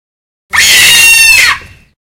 A baboon from the Wellington zoo. Genuinely frightening creatures. More animal sounds to come shortly!
baboon, monkey